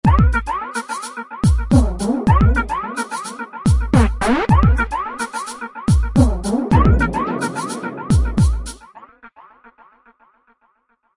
Just Something New.Kinda Spacey